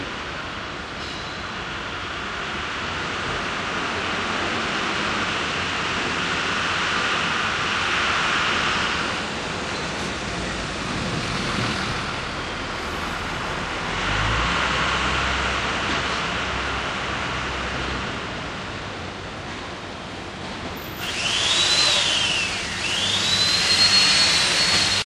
nyc burlcoatbroad ambiance
Outside Burlington Coat Factory on West Broadway in New York City recorded with DS-40 and edited in Wavosaur.
ambiance, field-recording, new-york-city, urban